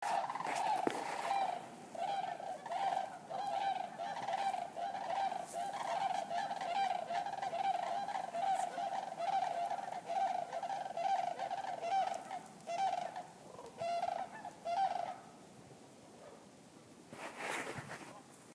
Captured on a river walk, one crane in particular was losing it in a way I'd never heard before
ambient, birds, cranes, field-recording, nature, river